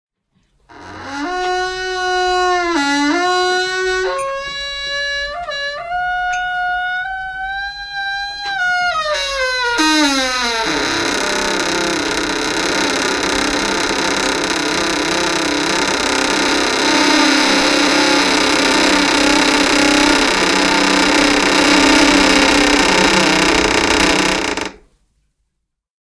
this is the original recording of the noise selected and broadcasted by SWR2 - Radio Baden-Baden, one week ago:
creak, creaking, door